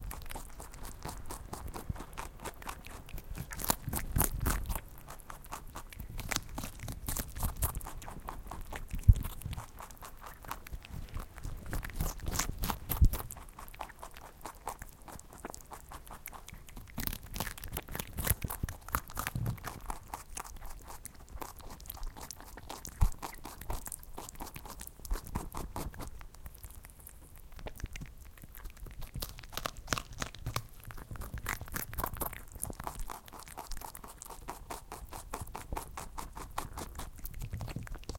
A rabbit is eating a cucumber
Recording of a European rabbit while it's eating a cucumber.
Recorded with Zoom H5
Process: Normalize -3db